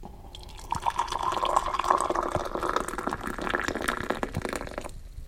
kettle pour into cup 001
Boiling water being poured into a mug.